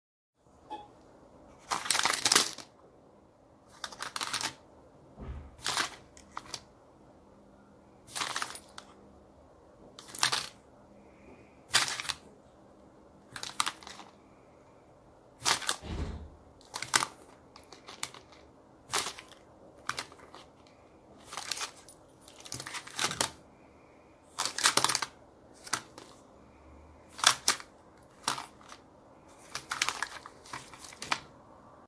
Pickup item
Sounds of an item being picked up from a surface.
Have a great day!
collect, item, item-collect, Pickup, up